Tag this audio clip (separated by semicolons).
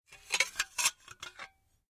glass; noisy; plate; scrape